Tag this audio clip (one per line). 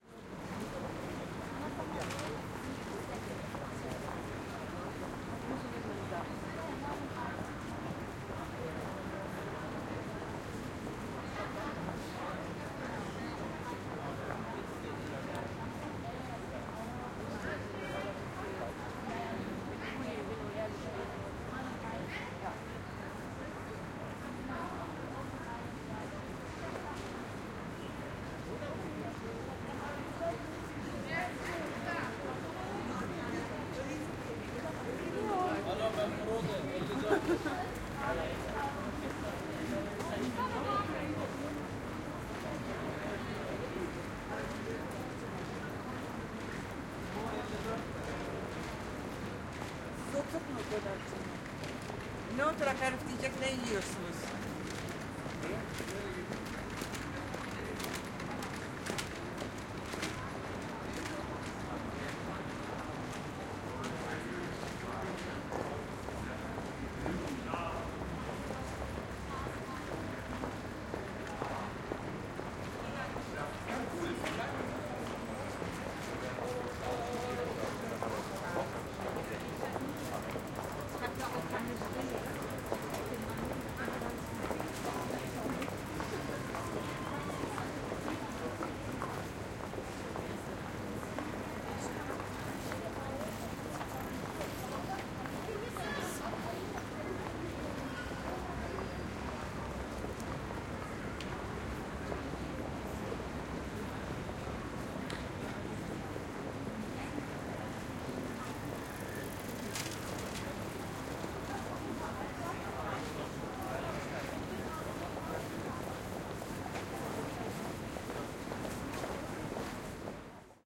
ngerzone
Germany
Deutsch
Pedestrian-Area
People-Talking
Fahrr
Walking
Passanten
City-Center
Walla
Bicycles
Martinshorn
Innenstadt
Field-Recording
g
che
People
Street
Menschen
ln
Atmosphere
German
Atmo
Ambience
City-Centre
Cologne
K
Fu
der
Gespr